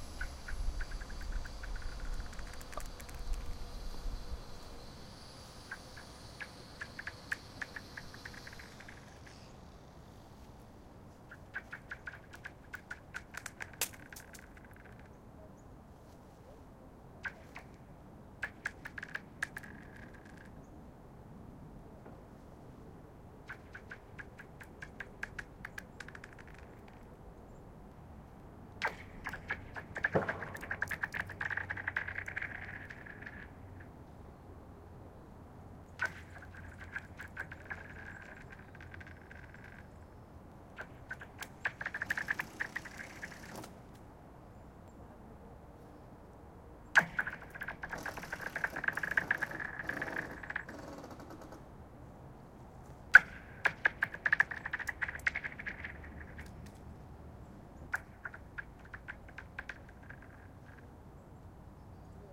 stones on thin ice
Small stones were thrown onto the surface of a frozen pond with very thin ice. Recorded Dec. 2011, near Minneapolis, MN with a Zoom H2.
At times, there are 2 construction guys talking in the distance.